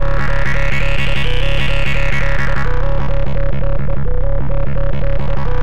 TR LOOP 0406
loop psy psy-trance psytrance trance goatrance goa-trance goa
goa, goa-trance, goatrance, loop, psy, psy-trance, psytrance, trance